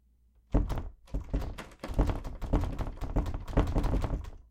Frantically rattling a locked door. I used it when a character realized they were trapped and locked in a house.
door, frantic, knob, lock, locked, panic, rattle, rattling